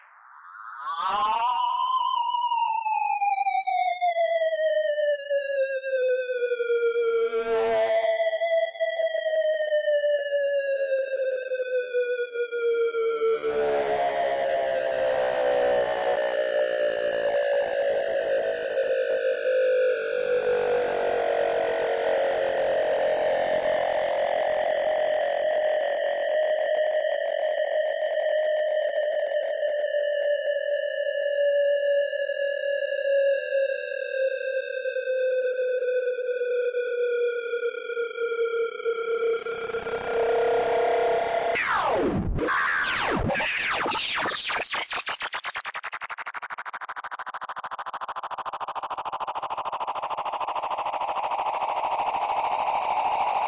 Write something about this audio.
Feedback texture created using Soundtoys Echoboy delay unit.